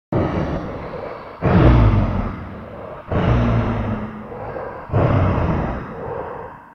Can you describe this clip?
beast panting
this is the second of two FX i've posted of the Beast I used for Beauty and the Beast in our theatre. I was having a terrible time finding a good sound so I just pitch shifted my own voice down an octave. This is the second where the beast is panting just before his second entrance. The other one is 3 growls (and one meow) of the beast.
enjoy.
kp
beast, creature